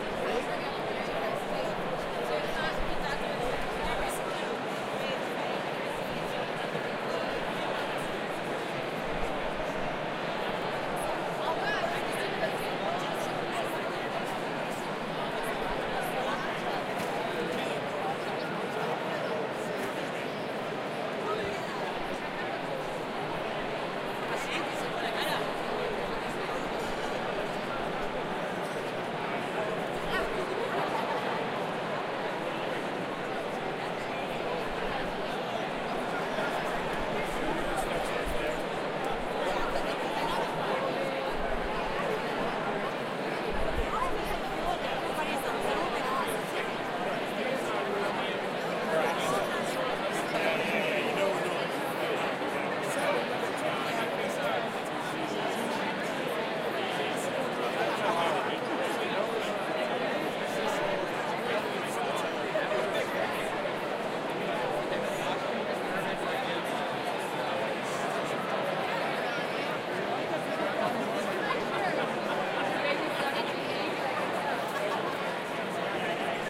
Large crowd very close
A clean raw stereo recording of about a thousand people chatting with each other. Includes distinct dialogue in American English, Iberian Spanish, French and other languages. Recorded in stereo on an H4n. The microphone was positioned inside the crowd. Recorded in a big space with huge ceilings--would be suitable for a theatre, auditorium, rally, sports game, etc., but an experienced editor might be able to make this into an outdoor scene as well. There might be minimal handling noise.
auditorium, Crowd, field-recording, indoor, indoors, inside, people, stereo, talking, theatre, voices